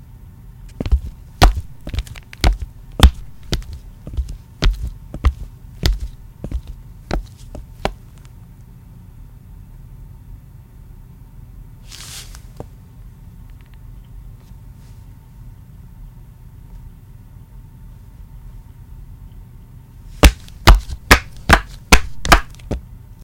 walking footsteps flat shoes tile floor 4
A woman walking in flat shoes (flats) on tile floor. Made with my hands inside shoes in my basement.
female, flat, flats, floor, footsteps, shoes, tile, walking